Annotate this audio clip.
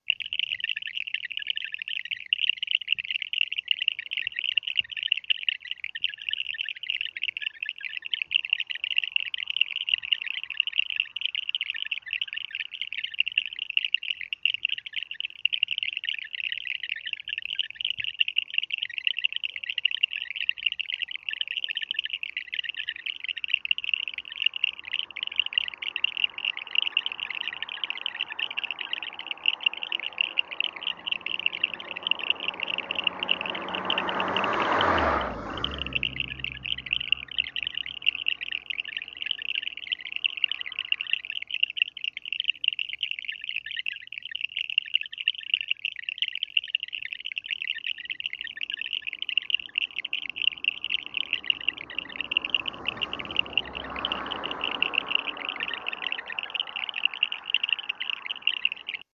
frogs in the ditch along Jonkershoek Road, Stellenbosch, Western Cape